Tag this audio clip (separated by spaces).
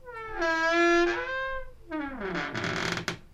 creak creaking door Door-creaking hinge noise